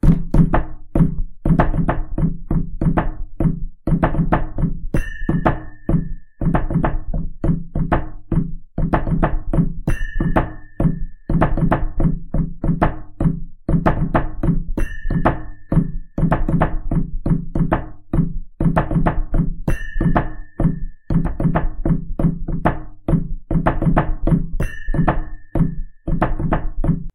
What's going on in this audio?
Ethnic Drum Loop - 1
Ethnic hand drum loop.
traditional, handdrum, hand, Africa, loop, ethnic, djembe, looped, Asian, drum, Indian, African